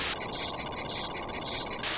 Using Audition 3 to sculpt images into white noise
Spectral, Sound-Design